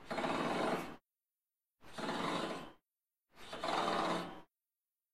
JBF Finger on Grate edit
finger, grate